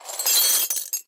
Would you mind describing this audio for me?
-Glass Impact, Shatter & Tinkle Hits
-Short Samples < 1s
-Foley, Game & Productions Sounds
Mic(s): Shure SM7B, Sennheiser MHK416.
Source: Breaking Glass
Processing: None